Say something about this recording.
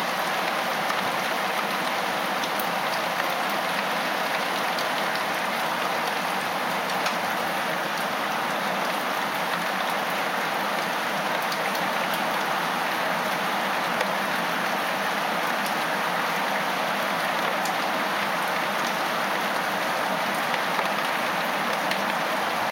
Short clip of heavy rain.
Recorded with a rode NT-5 placed outside window pointing down towards gazebo roof.
Compression and EQ on sample
England, Gazebo, Outside, Rain, Raindrops, Rode-NT5, Weather